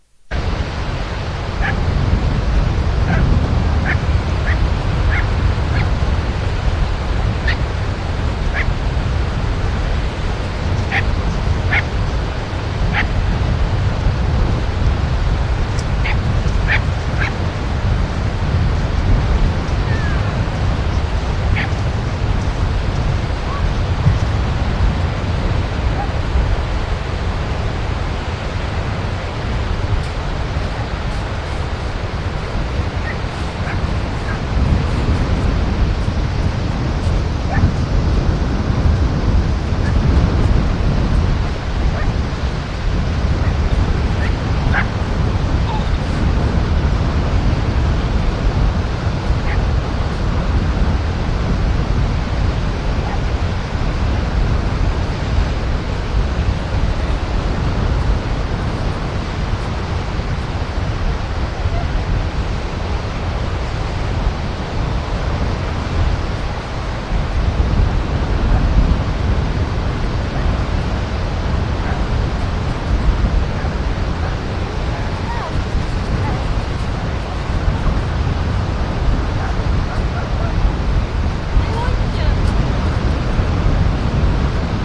Harlingen beach with dogs
Field recording at Harlingen beach (Netherlands).
Dogs are barking in the background.
barking, beach, coast, dog, dutch-coast, Harlingen, sea, waves